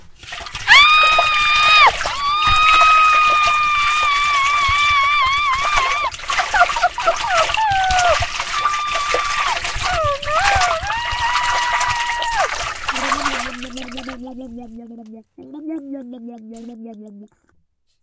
Cartoonish sounding female voice screaming with splashing water and blubbing water at the end.
water, attack, scream, shark, splash, drown